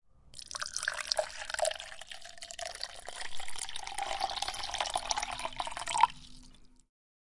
Poured some water into a glass.
liquid, OWI, pour, pouring, splash, stream, trickle, water